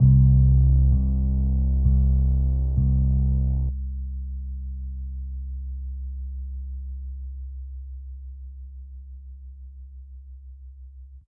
layered bass 01 quarter notes
Layered bass note(s). Layered analog and synth sounds in Fruity Loops. Filtered / amplified.